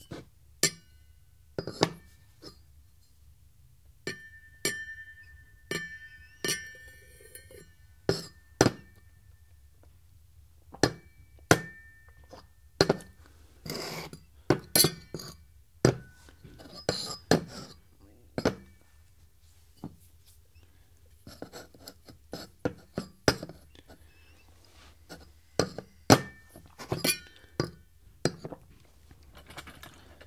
Indoor Wine Glass Clink Various
Various wine glass clinking and noises.
clink, effect, foley, glass, indoor, kitchen, sfx, sound, sounddesign, utensils, wine